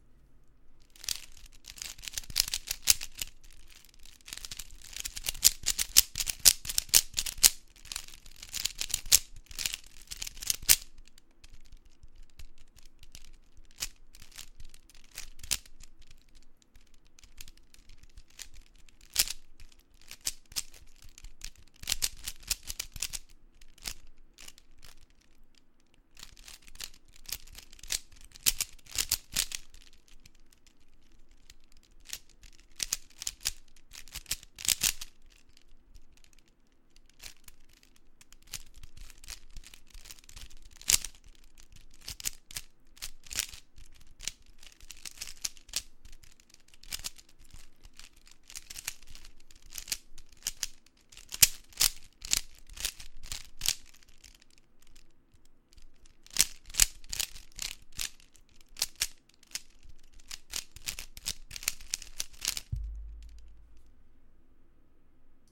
Me doing a quick solve of a 3x3x3 Cube